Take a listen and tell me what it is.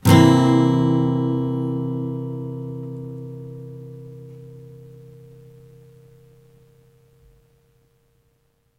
Yamaha acoustic guitar strummed with metal pick into B1.